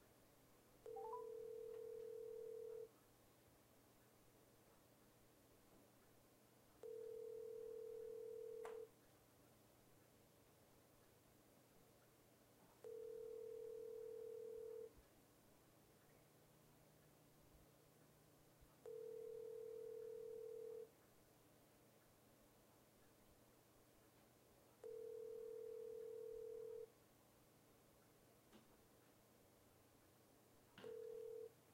Cell Phone Dial
The dial tone when calling someone on a cell phone.
calling, cell, dial, mobile, phone, ring, telephone